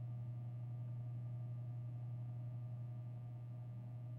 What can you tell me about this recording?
Vending Machine Ambient
ambient, machine, noise, vending